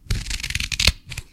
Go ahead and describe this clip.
scissors cut 4
Scissors cutting through several layers of paper